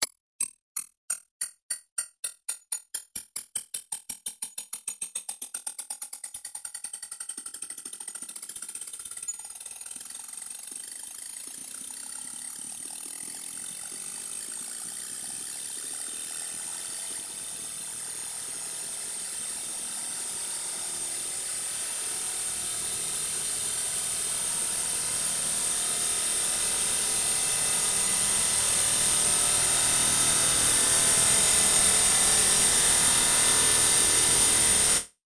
Metallic sound first granulated, then combfiltered, then waveshaped. Very resonant.
resonance, grain, comb, waveshape